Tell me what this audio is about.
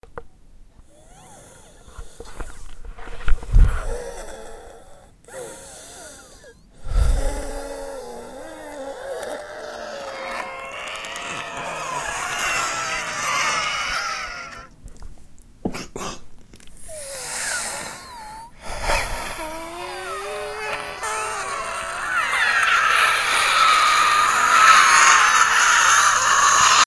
Scary Ghost / Monster silent Scream
had a hard bacterial bronchitis. took some deep breaths. recorded it.
that was fun (except of having the bronchitis)
breathe, bronchitis, crazy, horror, monster, scary, scream